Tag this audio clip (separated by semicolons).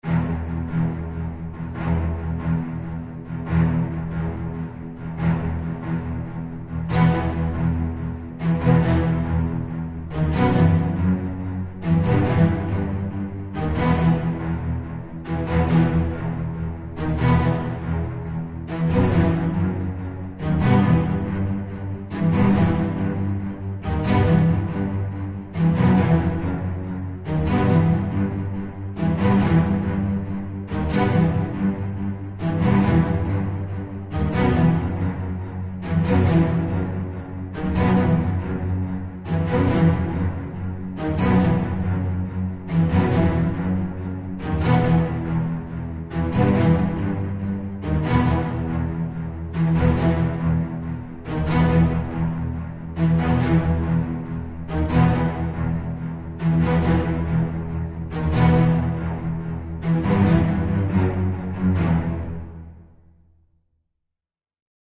classic; pizzicato; cinematic; ensemble; strings; orchestral; orchestra; viola